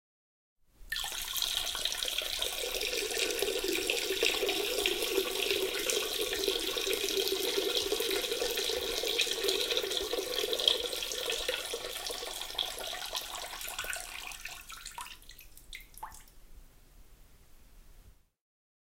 Peeing into toilet
20 seconds or so of me peeing into the toilet. Ummm, ok.
household, pee, peeing, sound-effect, toilet, urine